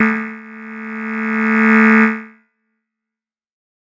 This is one of a multisapled pack.
The samples are every semitone for 2 octaves.
noise, swell, pad, tech